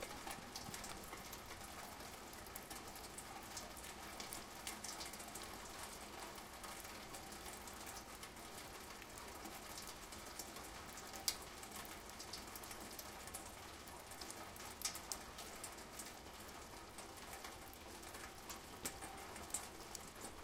Rain Gutter-SPB-033
Rain falling around and through the downspout of a rain gutter in the courtyard of the apartment building where I stayed in St. Petersburg. There were 3 or 4 downspouts from which I made a total of 7 recordings. September 3, 2012, around 4 PM. Recorded with a Zoom H2.